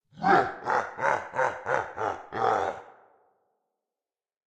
Evil Laugh
evil, laughter